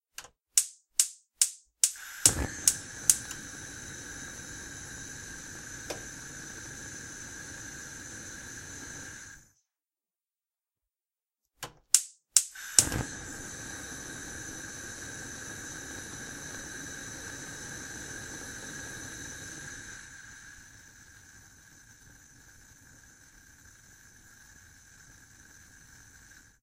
Starting Gas Cooktop
This is the HD sound of starting a gas cooktop. Similar to a gas burner.
burner, cooktop, flame, gas, starting